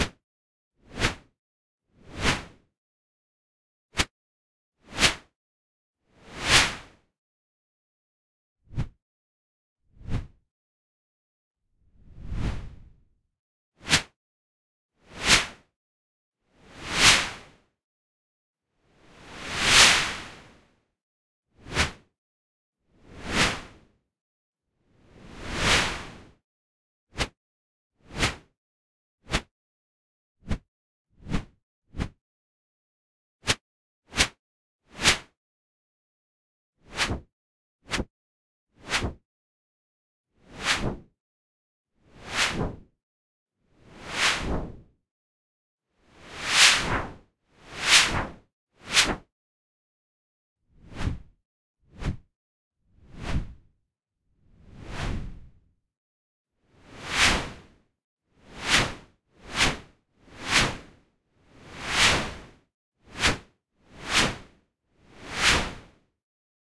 Swooshes, Swishes and Whooshes
A collection of swooshes, whooshes and swipes I generated for a medical explainer video. Most are paned center. Enjoy!
gamesound, luft, motion, sfx, sound-design, swash, swish, swoosh, swosh, text, whoosh, woosh